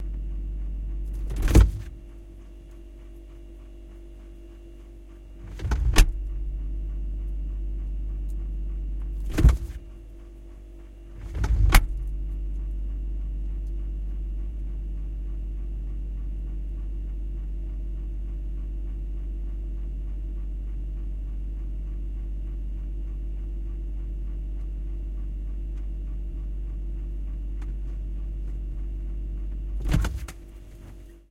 Mic inside the refrigerator.
Can easily be looped.